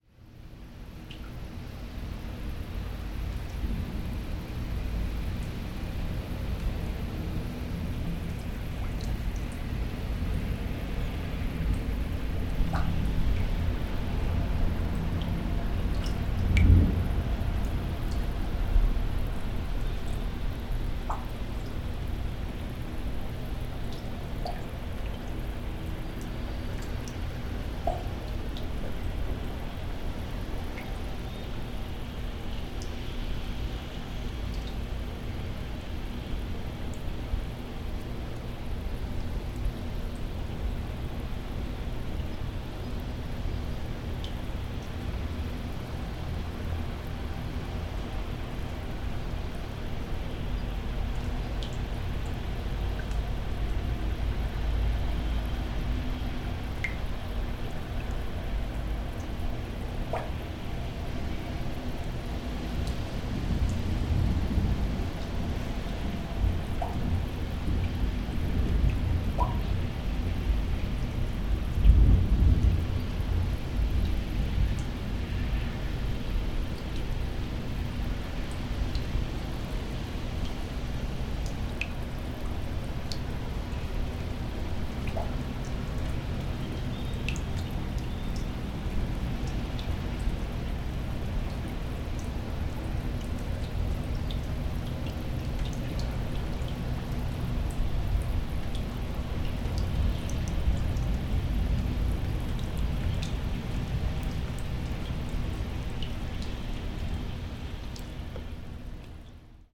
LLuvia gotas terraza
grabación después de un día de lluvia
gotas, lightning, lluvia, rain, raindrops, raining, Terrace, terraza, thunder, thunderstorm, ver, weather